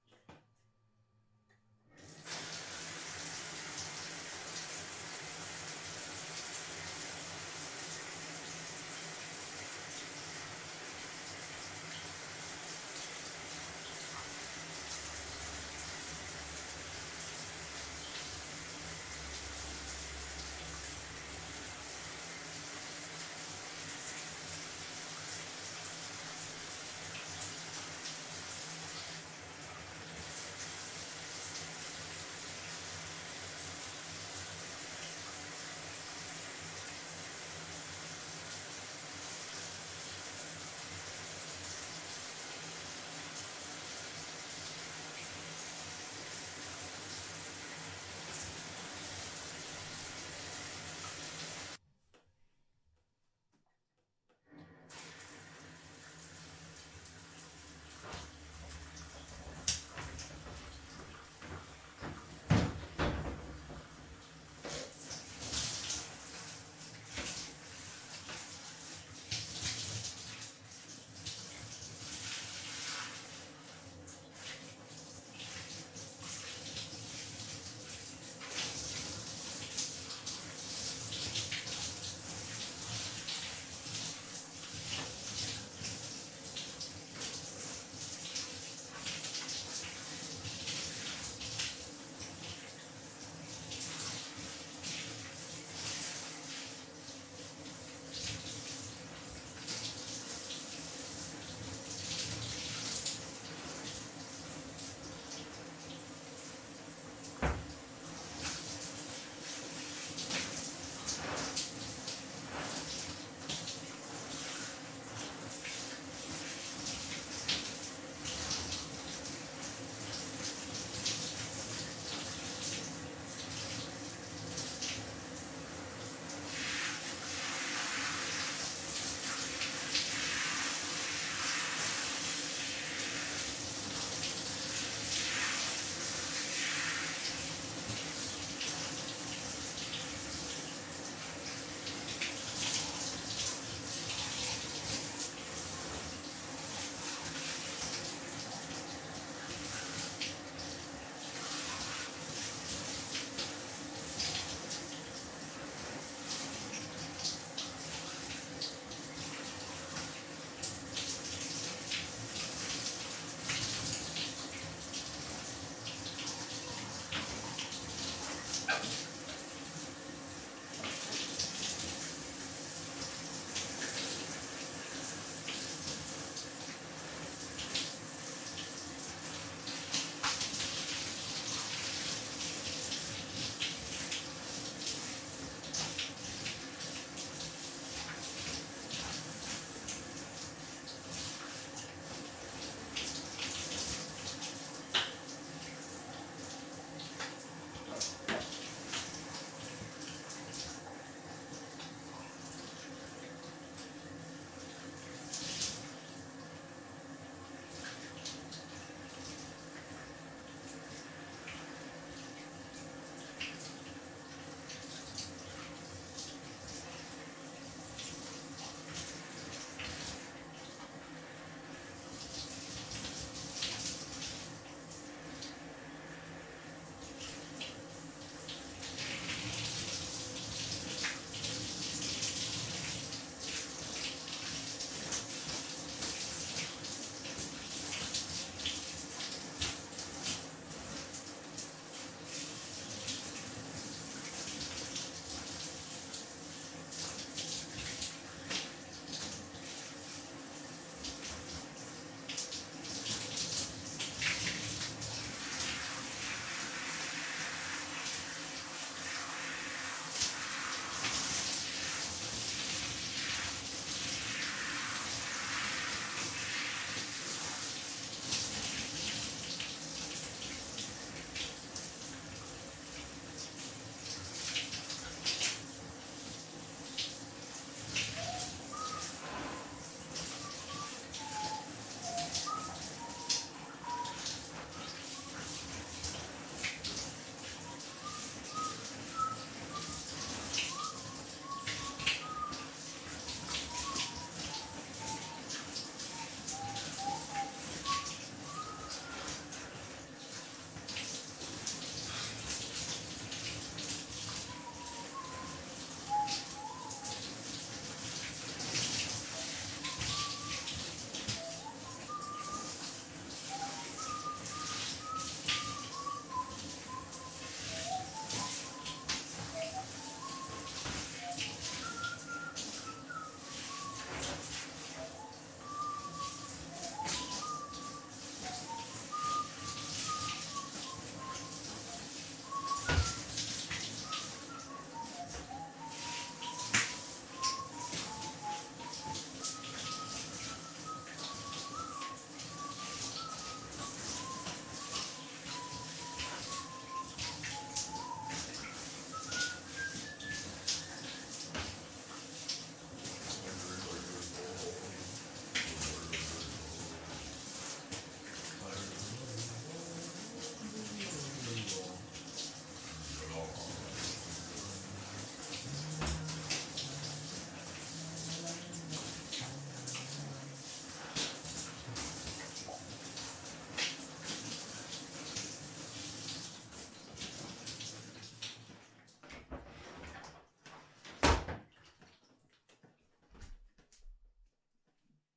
a man is taking a shower. first, there are only water variations, after a while the man begins to whistle and sing.
bathroom, man, bath, water, shower, male, cleaning, hygiene